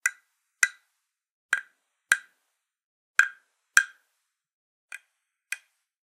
wood on wood taps
A decorative wooden frog bumpy on its back tapped with a wooden rod twice in four different places.
Recorded by Sony Xperia C5305.